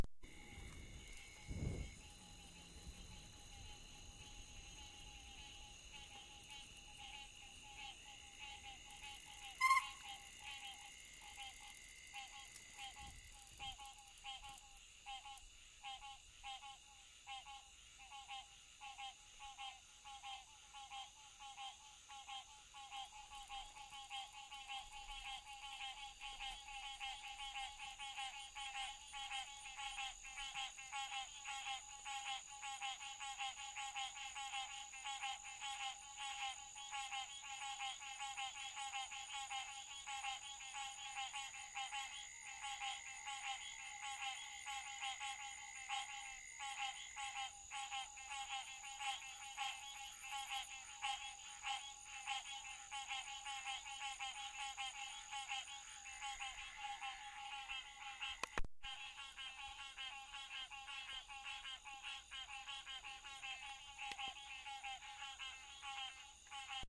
6 28 09 9 04 PM frogs 1
a cacophony of frogs recorded in a marsh near the coast of north carolina. you can hear the squeak of bicycle brakes as i rode into a particularly loud spot.
field-recording, frogs